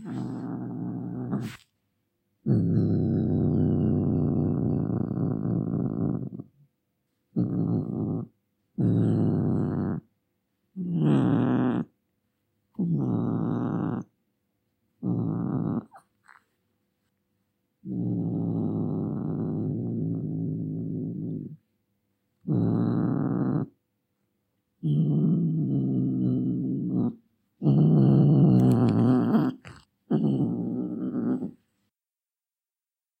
Angry cat sound.
How it was created: I caressed and upset my cat a little, without mistreating it. Recorded by me on a cell phone Samsung J5.
Software used: Audacity to reduce noise and export it.
Angry, Cat, Vexed